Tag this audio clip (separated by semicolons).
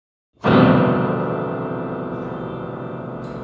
keyboard; piano; lost; synthesizer; crash; low-note; lose